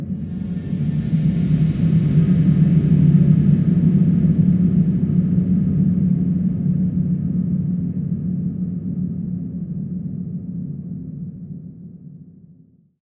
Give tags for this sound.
ambient; drone; long-reverb-tail; deep-space

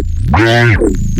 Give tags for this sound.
wobble; low; whatever; bass

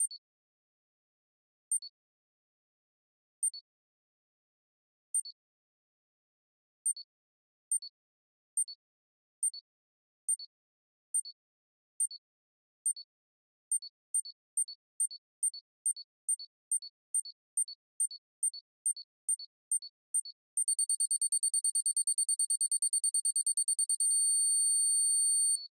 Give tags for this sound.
biep; synth; beeping; countdown; beep

